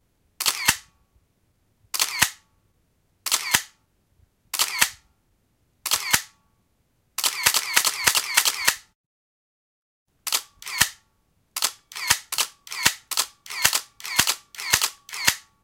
Minolta X570 with MD1
Actual sound of shutter release and film transport of a Minolta X570 (X500) with motordrive MD1. Warning beeps for underexposure